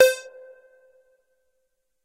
moog minitaur lead roland space echo